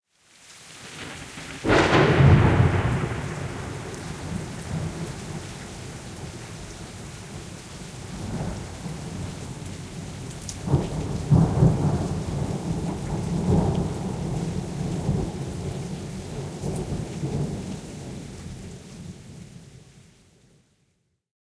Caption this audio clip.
Lightning and Thunder Clap
12.28.2020
Thunderclap recorded from a lightning strike about 2 miles away.
Captured from a Sony a7riii onboard microphone in stereo.
EQ-ed, compressed, and applied a limiter at -3dBu.
field-recording, lightning, nature, rain, shower, storm, thunder, thunder-storm, thunderstorm, weather